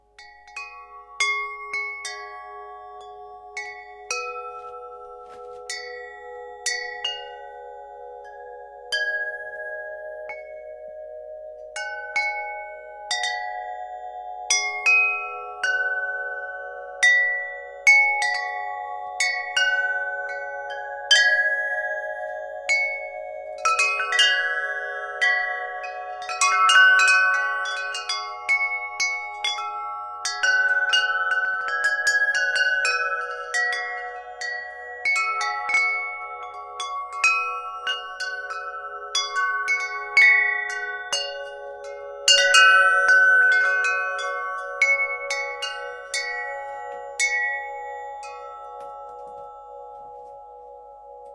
Tubes ooTi en vrak
Tubes tubular bells chime
chime, tubular, tubes, bells